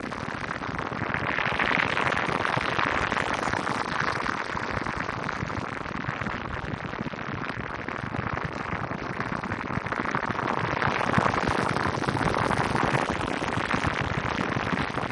spectral bubbles
Like a semi-liquid material leaking into space :)
Audio recording under heavy spectral processing.
alien, artificial, digital, fluid, interface, machine, robot, sfx, spacehip, spectral, strange